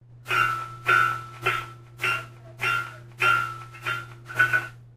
hammer and anvil
When I came to the strange decision to try recording my poems as songs I looked for ambience around the house. Small antique instrument
dinging, hammer, ringing